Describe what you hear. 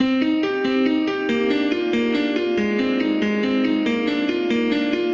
Ive been trying to work on my loops and thus am starting with piano riffs.
tell me if you use it for anything :D